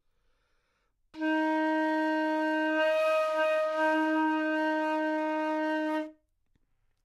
Flute - D#4 - bad-stability-timbre
Part of the Good-sounds dataset of monophonic instrumental sounds.
instrument::flute
note::D#
octave::4
midi note::51
good-sounds-id::3203
Intentionally played as an example of bad-stability-timbre
Dsharp4, flute, good-sounds, multisample, neumann-U87, single-note